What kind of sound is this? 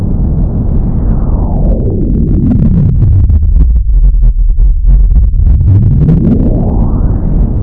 engine cut-damaged
The throbbing spaceship engine has a quick shutdown. At the end, it recovers and kicks back into gear.
damage, engine, recovery, sci-fi, spaceship, sparks